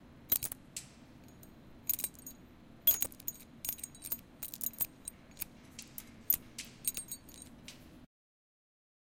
chain clanging
short audio file of chains being knocked against each other slowly
noise, chain, impact, wall, owi, metallic, clang, metal, clatter